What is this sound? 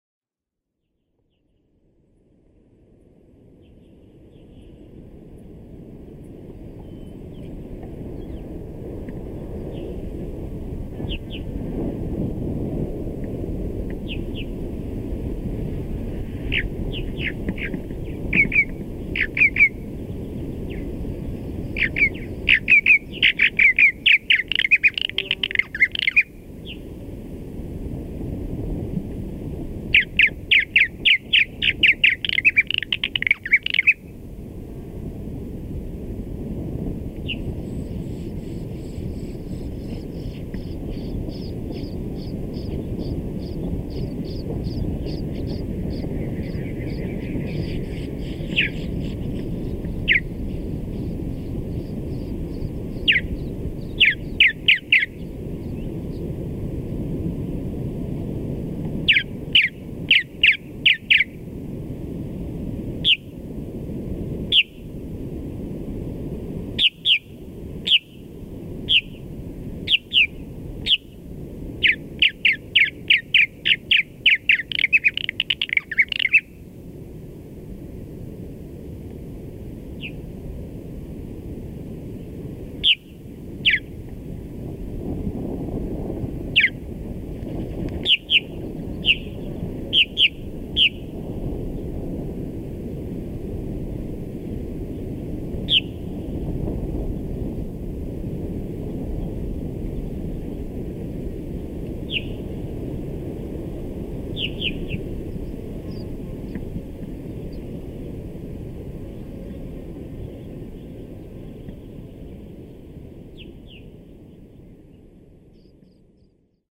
Purple Martin at San Carlos
progne-subis, purple-martin